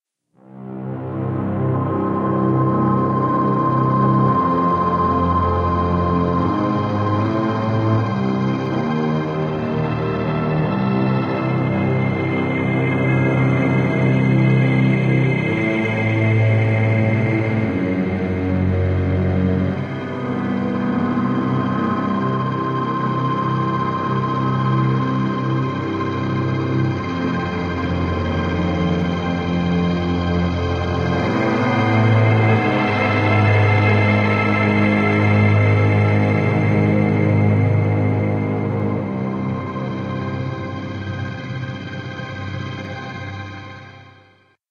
Twilight three
guess this sound would fit with some kind of alien or horror stuff... Made with Cubase SE